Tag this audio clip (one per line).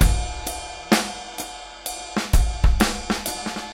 acoustic
drum
loops